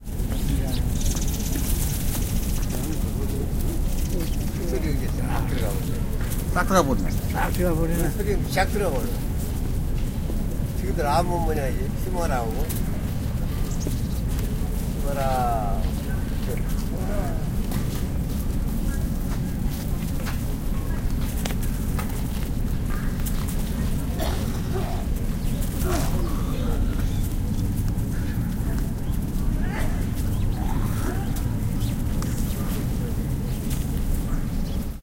People talking. Man cough and spit
20120118